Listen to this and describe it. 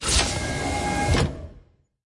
SCI-FI DOOR 2
Sound design that i made for a video game,layered a bunch of sounds and processed them to create an impactful sci-fi sound. this one is a spaceship door opening.